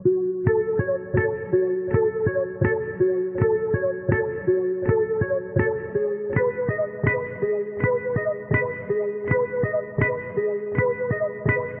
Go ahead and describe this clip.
Simple mellow electric guitar arpeggiation
arpeggiation, electric, guitar, rhythm-guitar